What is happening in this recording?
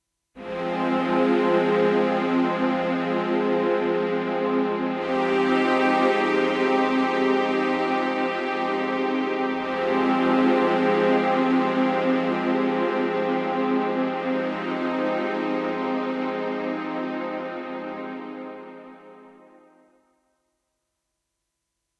Ambient Loops IV OUTRO Strings
These are Strings from the Roland Integra7.